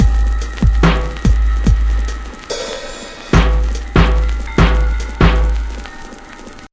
big beat, dance, funk, breaks